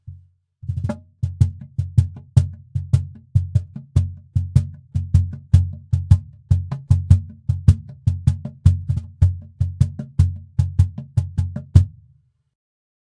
Cajon Recording-LOW
Cajon Recording with emphasis on low frequencies.Sample #2
recording, drum-loop, perc, Cajon, percussion, drum, drums